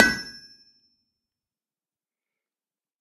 Forging yellow glow hot steel on a Lokomo A 100 kg anvil once with a hammer.